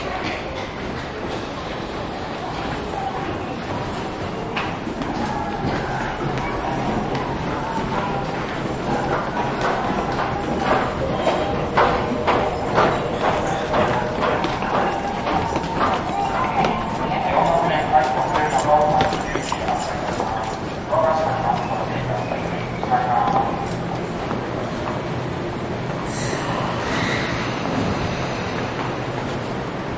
Japan Tokyo Train Station Footsteps and Noises 1
One of the many field-recordings I made in train stations, on the platforms, and in moving trains, around Tokyo and Chiba prefectures.
October 2016. Most were made during evening or night time. Please browse this pack to listen to more recordings.
underground, metro, departing, tram, platform, train, train-tracks, depart, railway-station, Japan, subway, beeps, announcement, train-station, railway, field-recording, Tokyo, public-transport, rail, station, arrival, train-ride, announcements, departure, footsteps, transport, tube